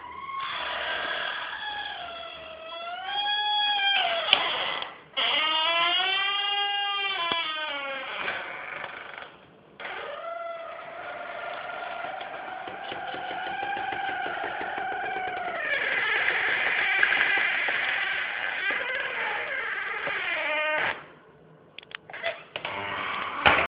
door squeak 04 02 11 20
Long door squeak, door opening and shutting
door, opening, squeak